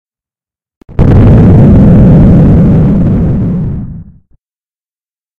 Mudstick Explosion2
Mudstick means that I hit a stick into a muddy spot and digitally edited the resulting sound. The 101 Sound FX Collection.
explosion, hit, mud, pow, crash, stick, splat, boom, smash, explode